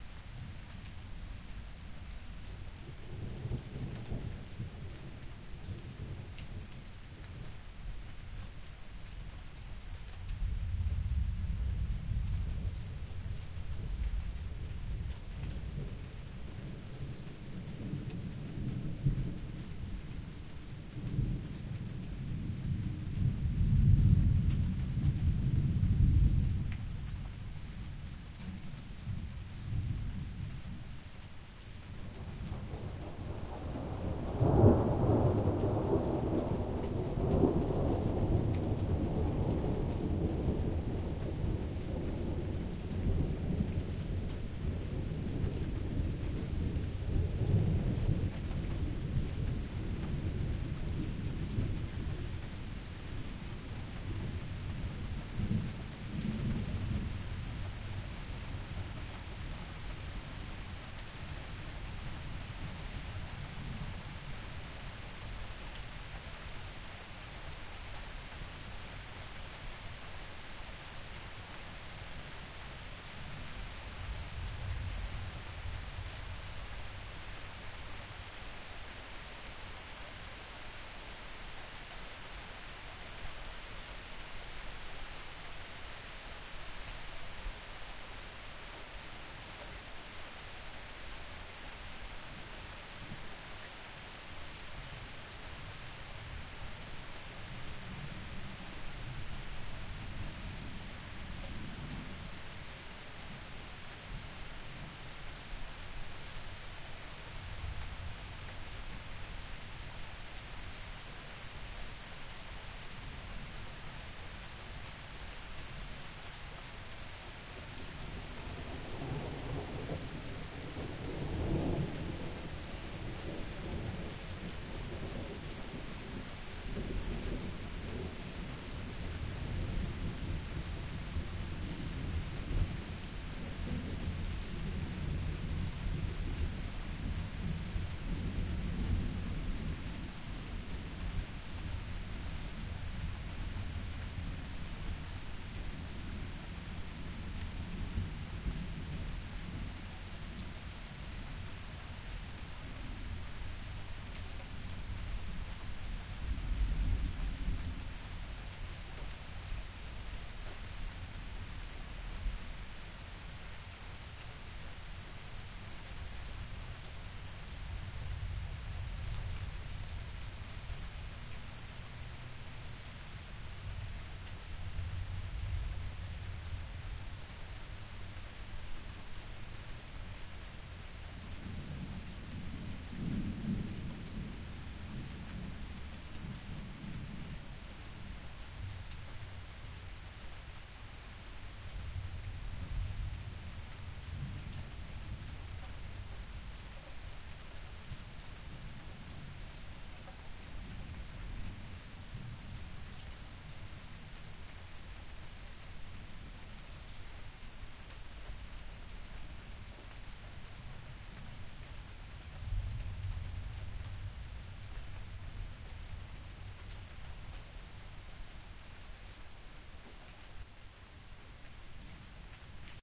AMBIENT LOOP - Perfect Spectacular Hi-Quality Rain + Thunderstorm 003
A longer seamless loop of thunder and lightning that crackles, booms and rumbles. Very heavy bass and extremely high quality audio. Recorded with a H4 Handy Recorder. (my personal favorite clip in the Thunderstorm series, very speaker-rocking with the incredible bass from the thunder!)